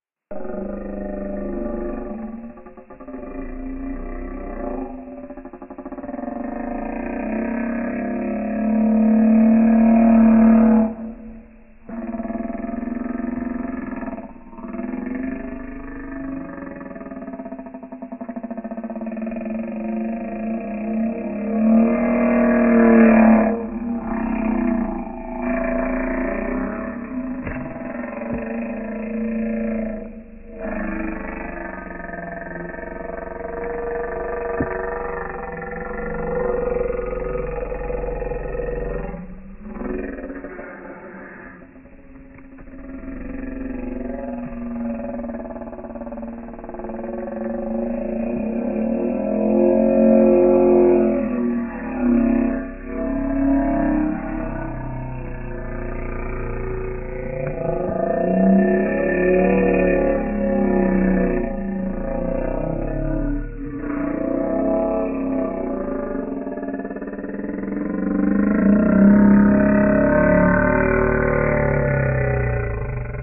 YZ5hhh
You Think this is enduro mc machine sound? Or other Engine? Ha.ha....it's a growwling wild animal.
animal, lion, processing, roar